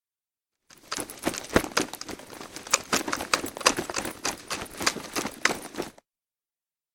A soldier running with his gear through an open field.
sound, sfx, soundeffect